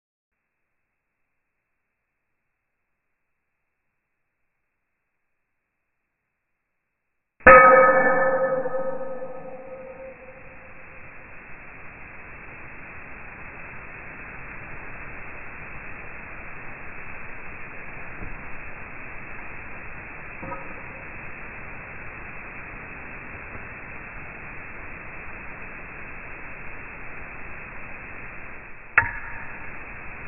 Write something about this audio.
Don't do this without experts present! The sound of a high speed bullet hitting a one centimeter hard steel plate. There were ricochettes!